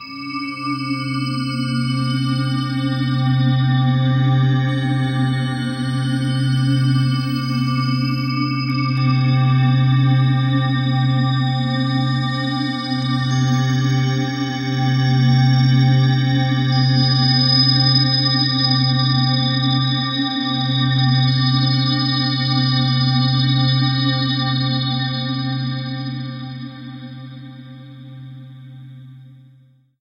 THE REAL VIRUS 06 - BELL DRONE - C4
Drone bell sound. Ambient landscape. All done on my Virus TI. Sequencing done within Cubase 5, audio editing within Wavelab 6.
ambient, bell, drone, multisample